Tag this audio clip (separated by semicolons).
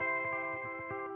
arpeggio; electric; guitar; spread